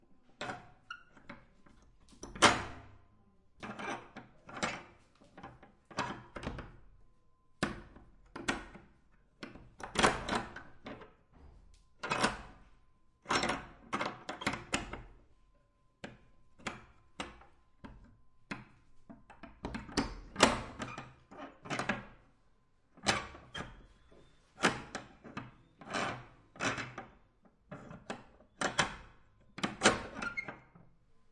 deadbolt on bathroom wall castle dungeon heavy metal door pull in and out echo latch lock unlock squeak on offmic more hollow
unlock, latch, open, heavy, close, pull, bathroom, deadbolt